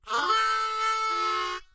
Harmonica Rip Shift 01
This is a rift I played on an M. Honer Marine Band harmonica.